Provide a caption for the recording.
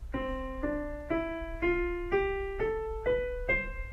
C major piano scale
c-major, music, piano, piano-scale, scale